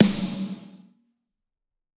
Snare Of God Wet 032
drum, drumset, kit, pack, realistic, set, snare